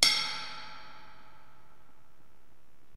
crash 2 bell 1
This is a crash from another 14" cymbal that was bent to hell but sounds really cool live.
almost like a trash can lol.
bell, crash, cymbal, live, loop, loops, rock, techno